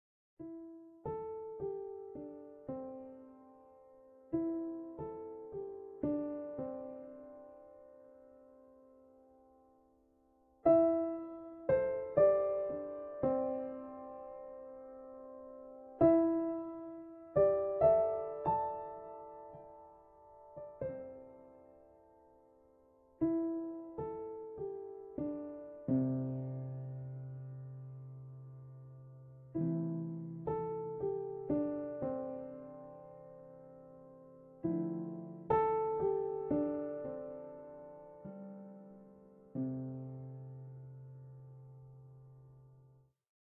22. Música ambiente
Ambience music relaxing
ambience, music